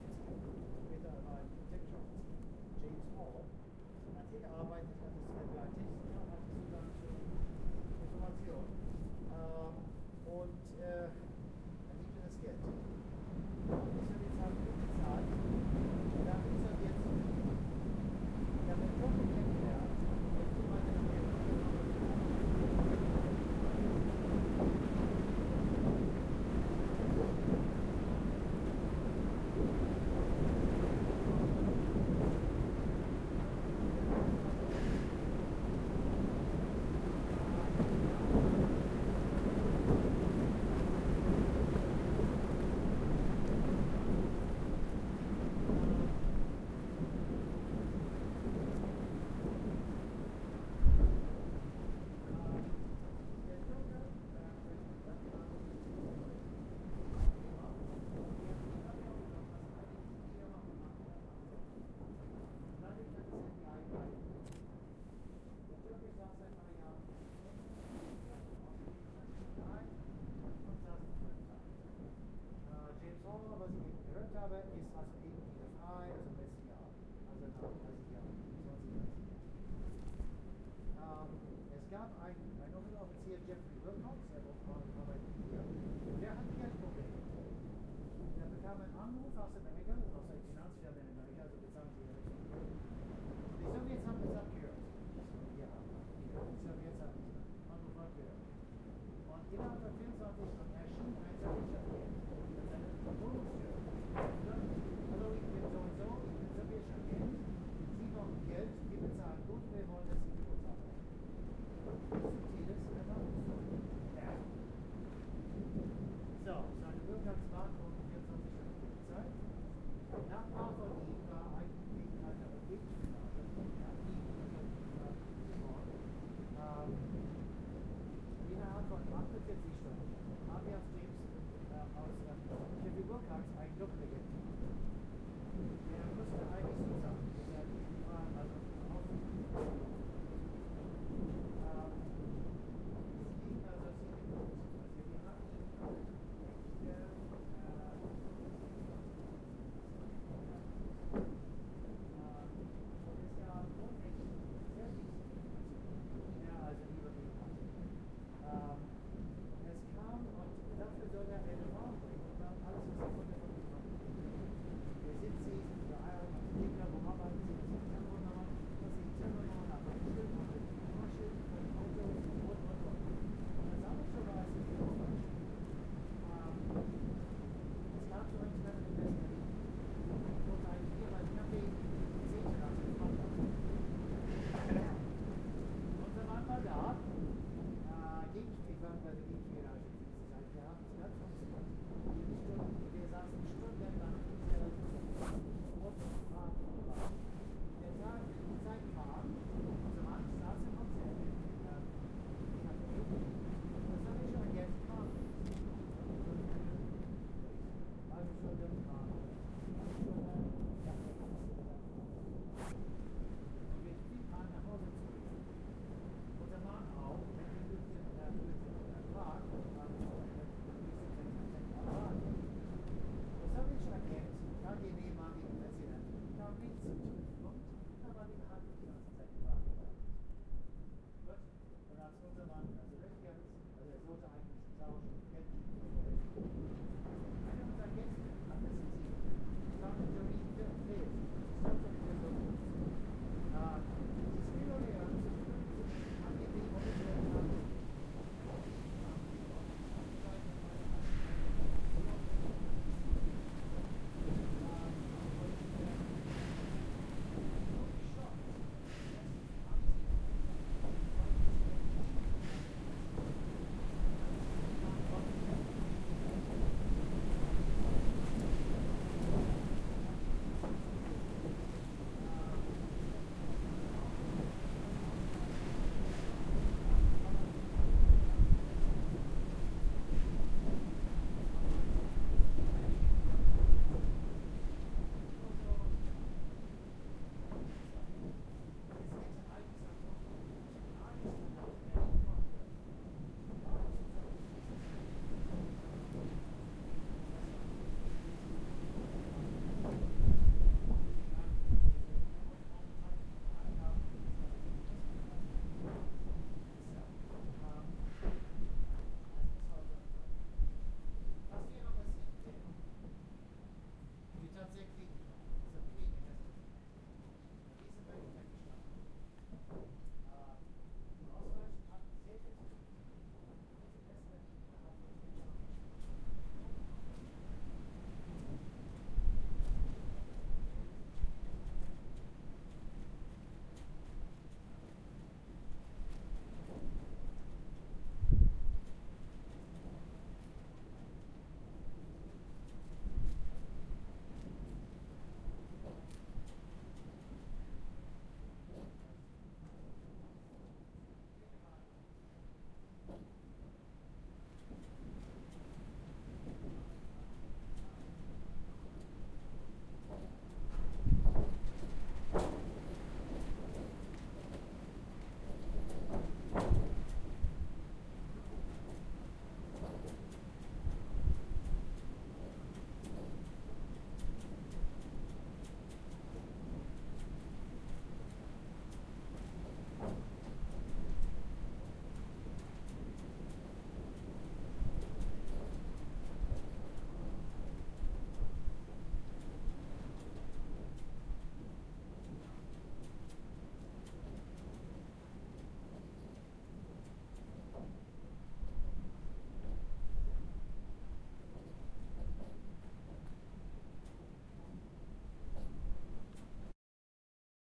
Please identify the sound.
Teufelsberg ambient sounds
Abandoned military base outside Berlin.
abandoned, building